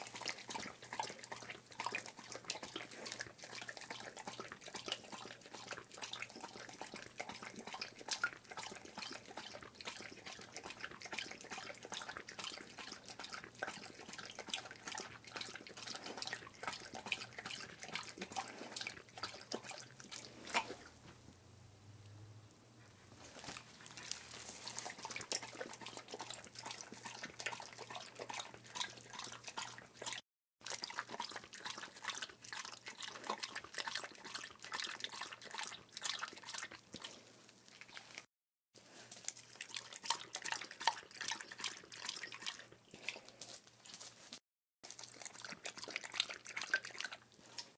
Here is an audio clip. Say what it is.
dog drinking water in unfinished basement acoustic closer towards end
unfinished, water, drinking, basement